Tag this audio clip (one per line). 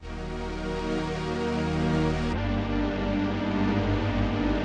80bpmdrybreak
deep-into-perspectives
353700